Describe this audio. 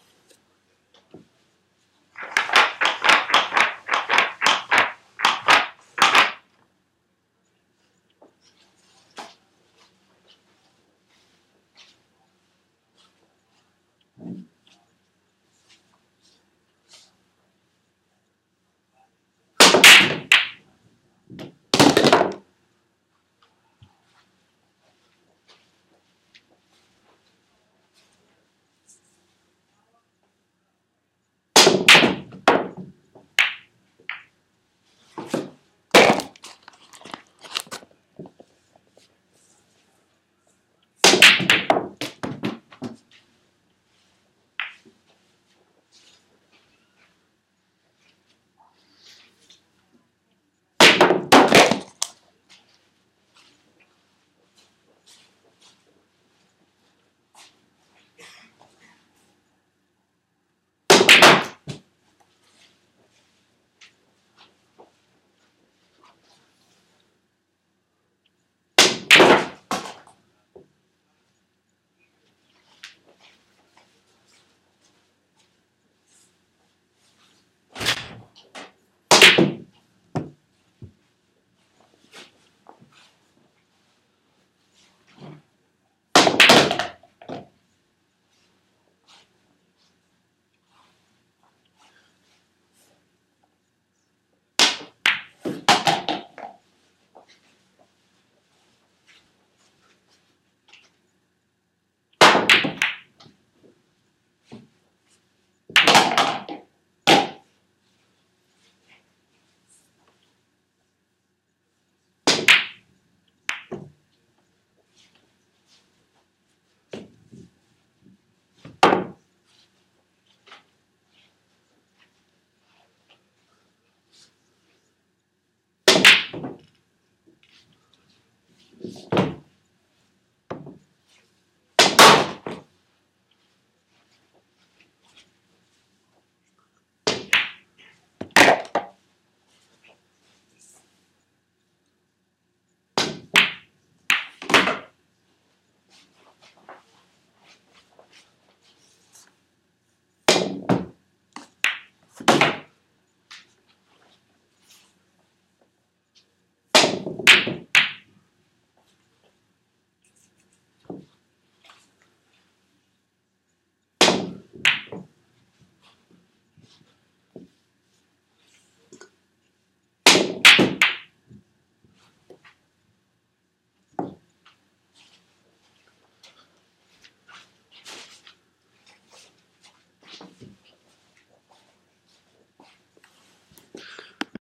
Male Basic#01
Racking of the pool balls then breaking and then shooting the balls into the pockets.